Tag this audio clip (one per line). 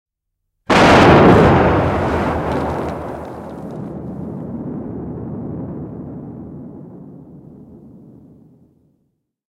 Soundfx
Pamaus
Rustling
Yleisradio
Tehosteet
Finnish-Broadcasting-Company
Suomi
Pamahdus
Explosion
Finland
Interior
Rustle
Yle